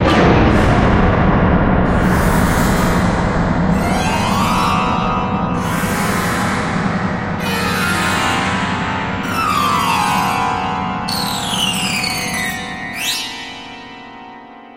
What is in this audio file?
Dramatic piano
This piano hit is of the more dramatic hits. Perfect for cliffhangers in theatres / cinemas / video games where you or the character experience a tremendous shock of a huge magnitude. It is recorded in FL Studio 7 with the help of Nexus expansion TotalPiano.